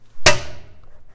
Bathroom LidOfTheToilet
lid of the toilet
flush, wc